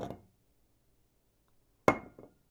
Pickup or Set down glass bottle
Beer bottle being picked up and set down on a table
glass-bottle, clink